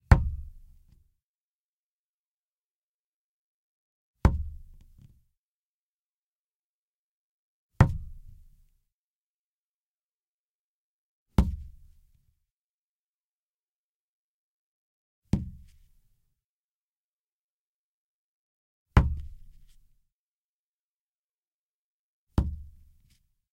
Placing a bullet on a card table.
bullet, gun, place, table